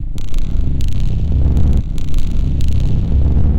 eerie slow stablike rhythmic loop; used it in a horror film i scored a while back; made in Adobe Audition